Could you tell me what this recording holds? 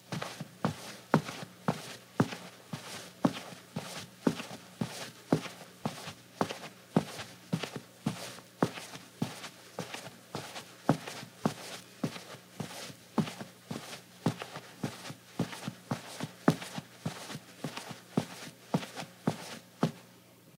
01-25 Footsteps, Rug, Slippers, Medium Pace
Slippers on rug, medium pace